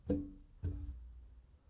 Prop Table Glass
Foley - Props - Glass table
Mic- Sennheiser MKH-416
Interface (USB) Fast Track Pro
Software Pro Tools 8.0.5
Foley, Glass, Table